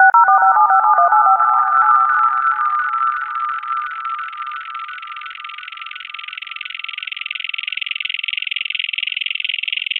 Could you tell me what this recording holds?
DTMF ring tone with an echo effect added to it.
dtmf, ringtone, echo, phone